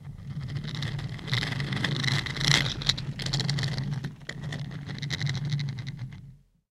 A little rubber wheel - as used on furniture. I held it up to an exercise bike flywheel, and recorded it with an SM58-Mackie Mixer-Audigy soundcard. Part of a sound design cue for a sandbag rope coming loose.
pulley, soundeffect, wheel, spinning